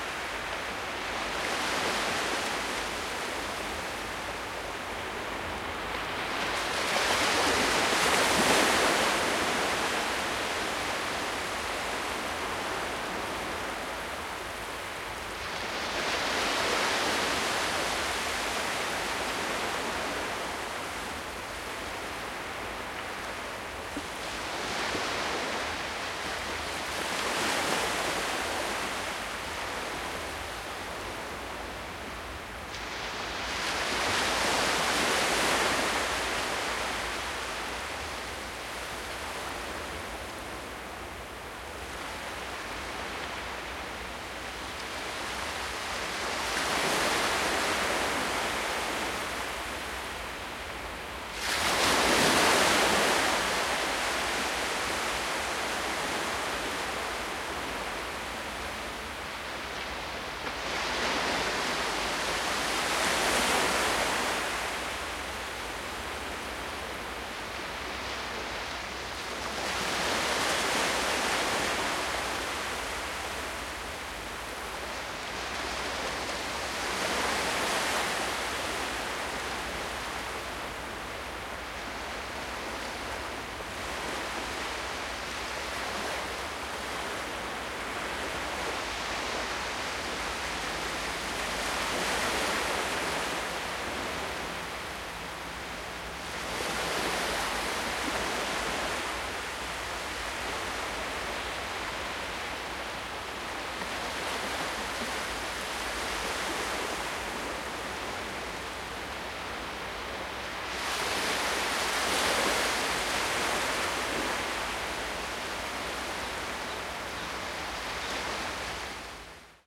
WATER OCEAN WAVES 01
Gentle sea waves recorded at night in Thailand.
ocean, sea, splash, water, waves